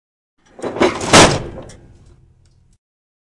FX switch upstairs
multi recording of large switches in an old theater.
breaker, bull, kill, switch